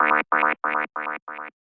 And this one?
TR LOOP 0402
loop psy psy-trance psytrance trance goatrance goa-trance goa